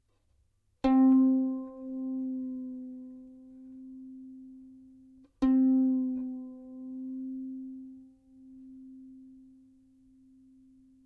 4th string of a violin played open with finger, shifting pitch. recorded with Rode NT4 mic->Fel preamplifier->IRiver IHP120 (line-in) / cuarta cuerda tocada al aire con el dedo y cambio de tono
musical-instruments, strings, violin